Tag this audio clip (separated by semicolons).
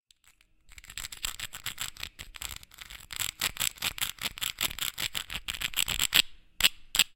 MTC500-M002-s13; glass